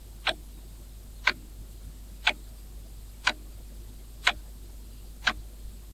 wall clock tic tac sound
clock, tac, tic